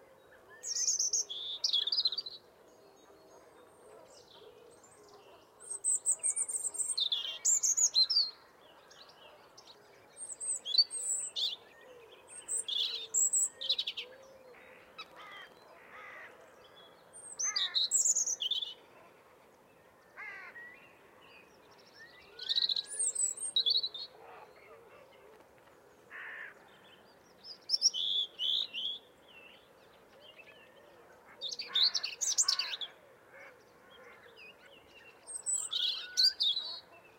This is a European robin singing. It was recorded on the morning of 17th February, 2018 at Wrabness in Essex, UK. Recorded using a Sennheiser K6/ME66 attached to a Zoom H5.
The recording was edited with Audacity. No changes have been made to volume, pitch, etc. The only editing done has been to remove unwanted sounds. This has resulted in some shortening of intervals.
birdsong, European-robin, field-recording, nature